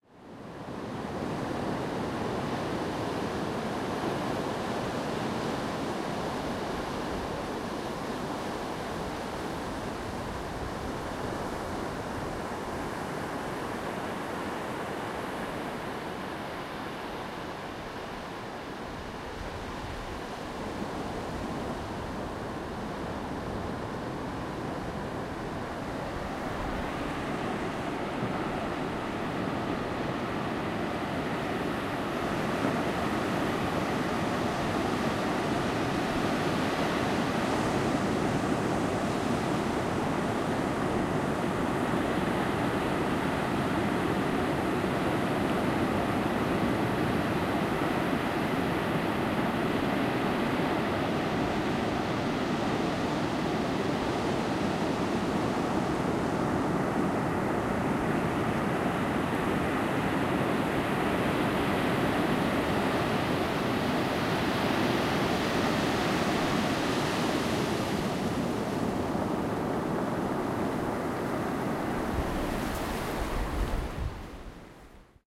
waves beach nighttime
Soundscape of waves at the beach in Morocco
sea, morocco, sandy, coast, seaside, surfing, field-recording, splashing, shore, ocean, nature, nighttime, waves, water, wave, sea-shore, splashes, sand, seashore, surf, beach, splash, crashing, breaking-waves, relaxing